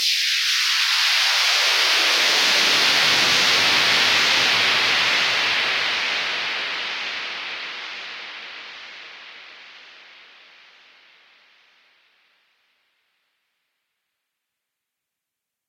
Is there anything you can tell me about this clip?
Made with synthesizer, distortion, doubler, delay and stereo imager. But I think I need more heavy distortion!
liftdown, builddown, synthesizer, noise, EDM